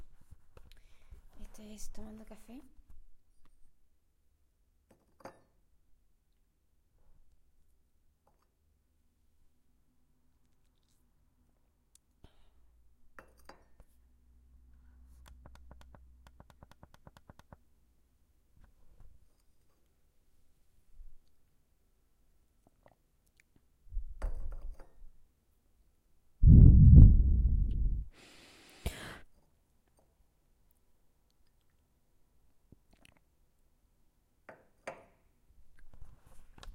A woman takes a sip of coffee three times, the last one is better!